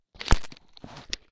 Contact mics handling noise 01
Some interesting handling noises on my contact mics.
contact-mic, handling, piezo